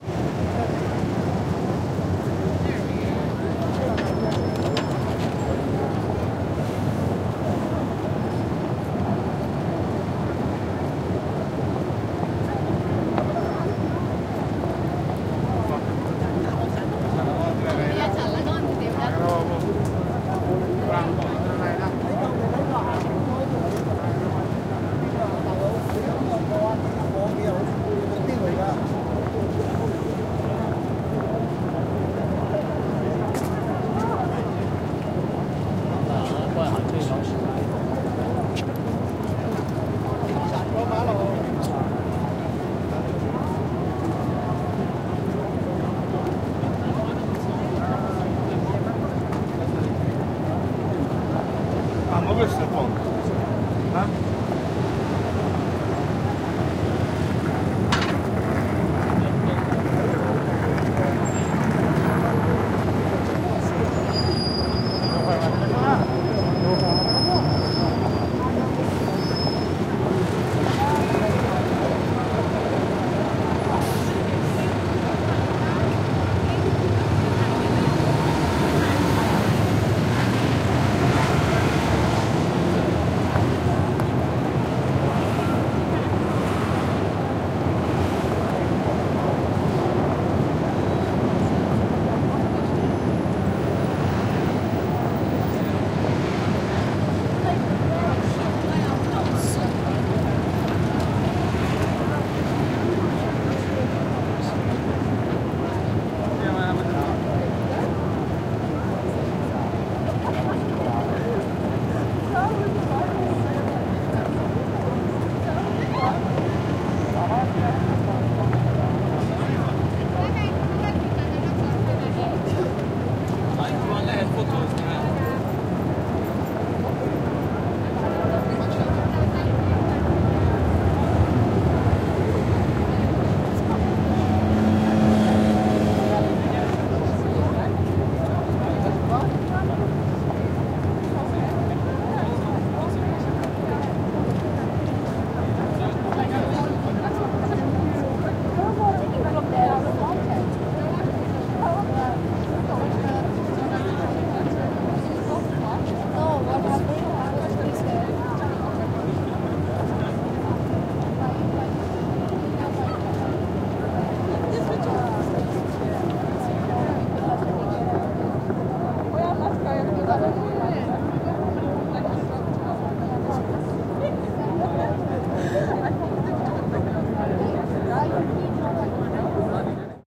Ambience recording of Canary Wharf, London on a week day.
If you would like to support me please click below.
Buy Me A Coffee